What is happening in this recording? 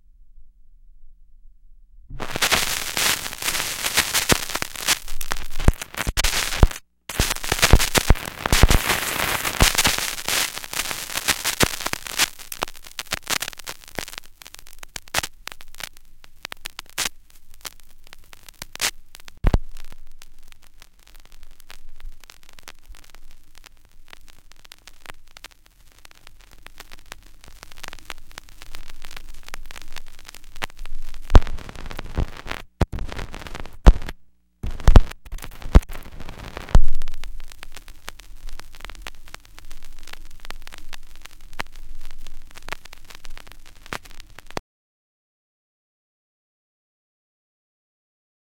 the crackling of an old scratched record
record, scratch